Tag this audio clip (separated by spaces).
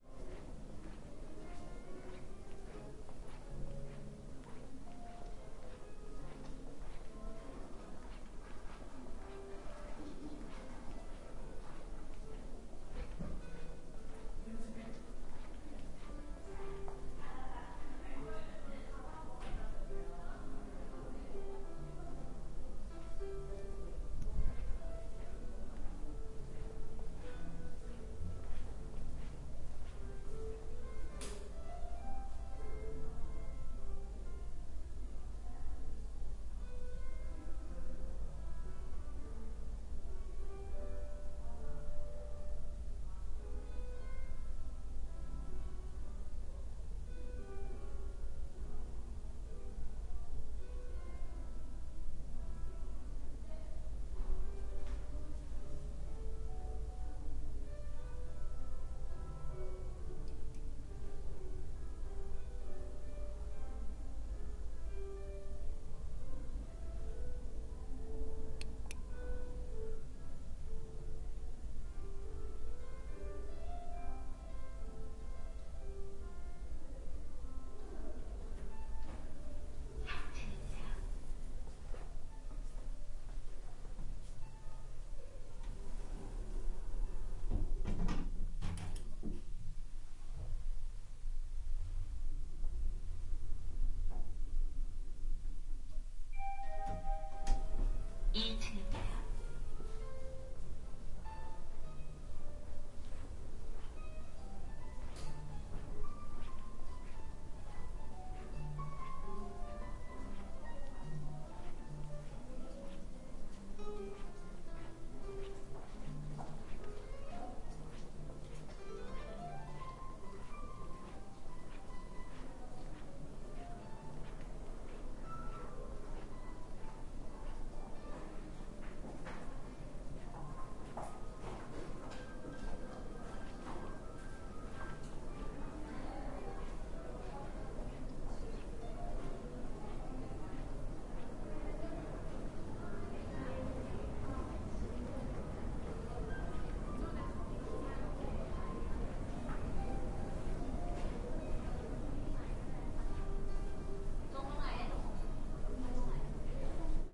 footsteps korean